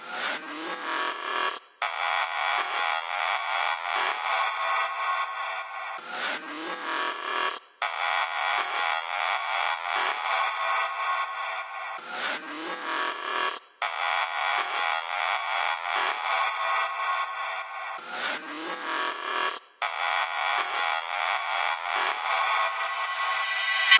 fx teken-21
delay, effect, fx